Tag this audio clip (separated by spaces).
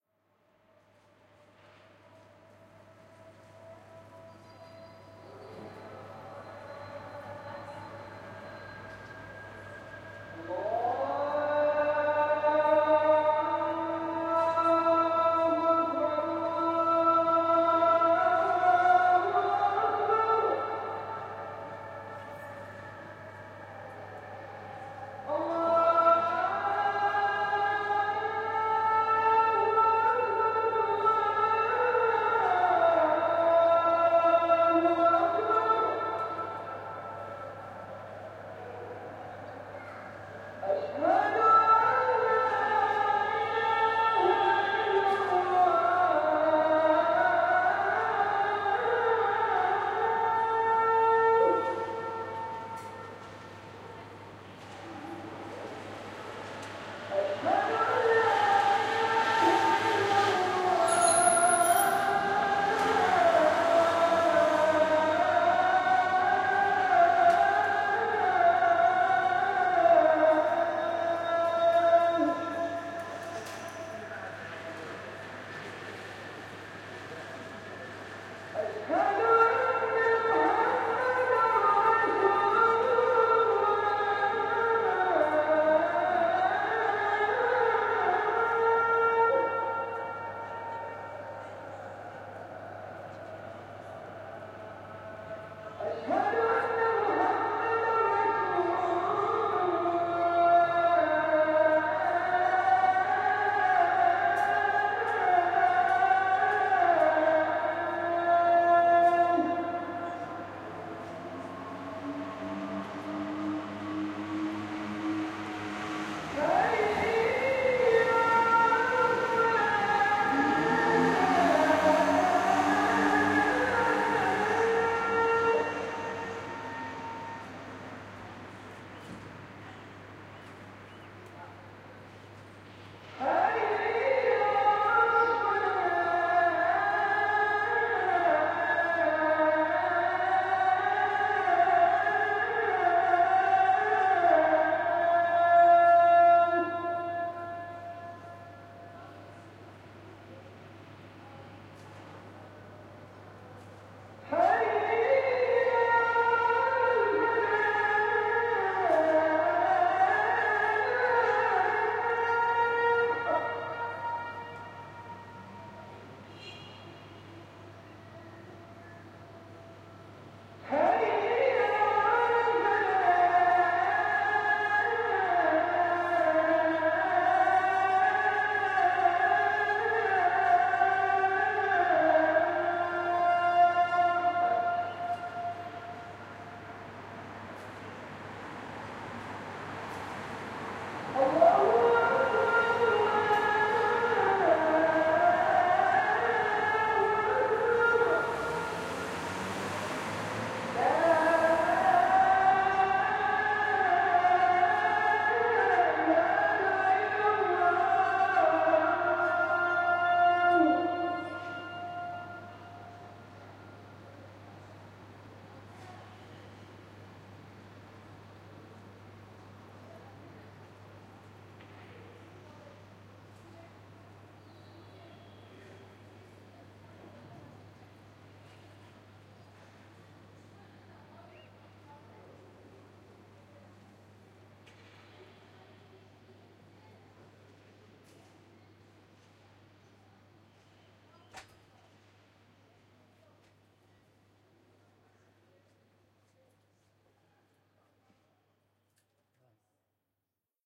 Arab Beirut Hamra Lebanon Pray islam mosque muezzin muslim quiet